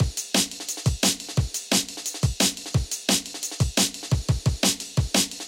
dnb beat 1 175
Typical drum'n'bass loop with punchy kick & snare.
2step, bass, beat, break, dance, dnb, drum, jungle, loop, processed